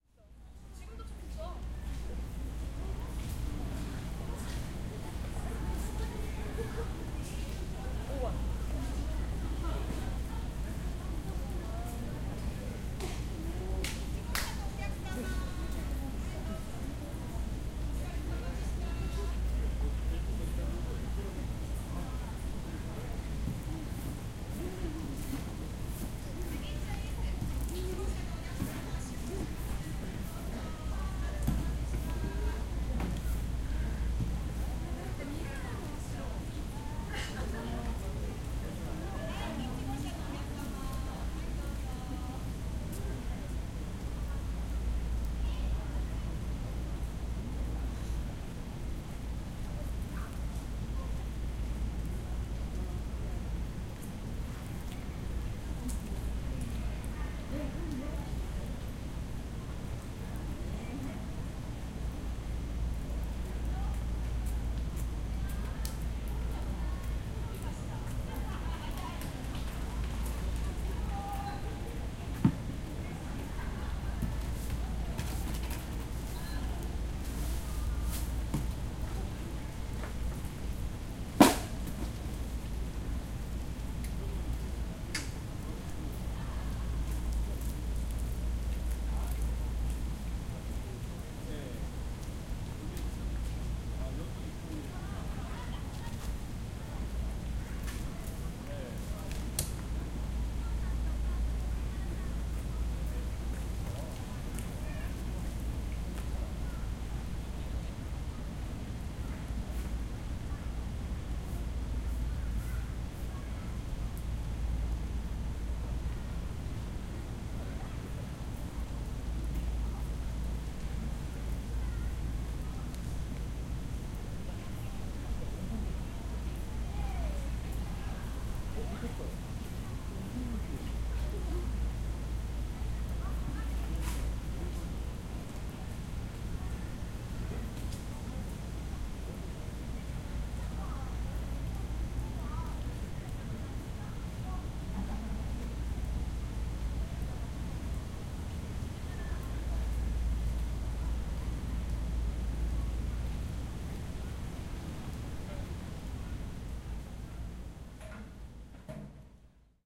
0367 Ambience Namsan Tower
Ambience in Namsan Tower. Geographical center of Seoul.
20120713
field-recording, korea, seoul, people, ambience